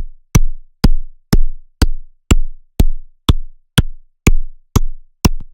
A synthesized indicator light. apply IR to suit interior of choice. Handy for post production where the indicator light is missing.